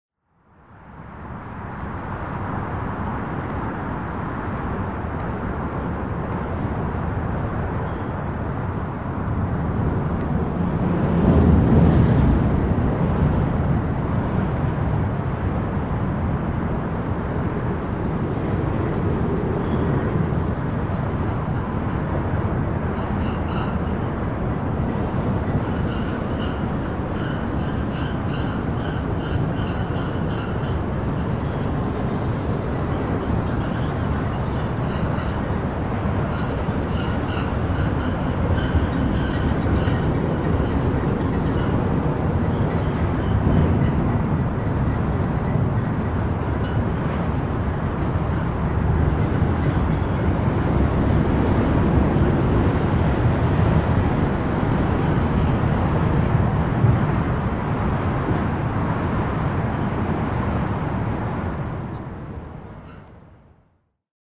Underneath Highway
This is a sound recorded during July, 2011 in Portland Oregon.